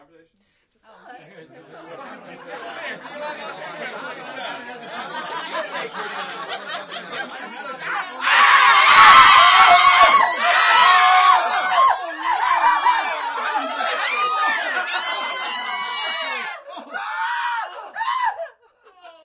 Party then screams
Third take - A group of people talking as if they were at a party or gathering - then something HORRIBLE happens. This was made for background audio for a play in Dallas. Recorded to a Dell Inspiron through Audigy soundcard, simple stereo mixer and two SM58 microphones